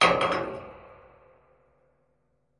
Tank of fuel oil, recorded in a castle basement in the north of france by PCM D100 Sony